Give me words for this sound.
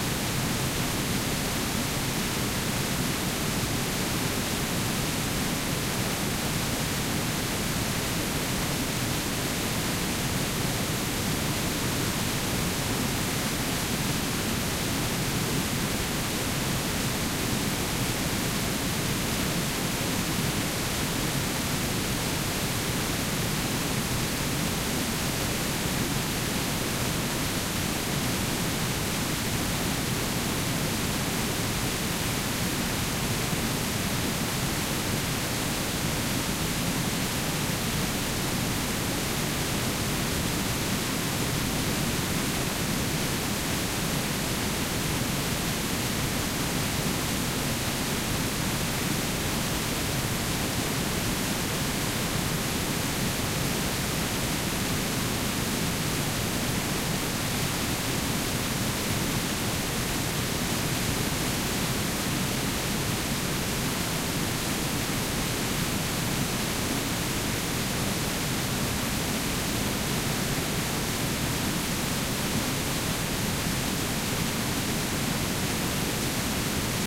The sound of the Haifoss Waterfall. Recorded with two AKG-P220 microphones.
River, Water, Waterfall, Field-Recording, Iceland, Nature, Haifoss, Stereo